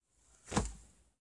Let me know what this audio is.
bola de nieve